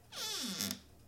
cupboard creak 4
A short creak, opening a different cupboard at "normal" speed. Similar to "cupboard creak 3" with a different tone.
kitchen, creak, door, cupboard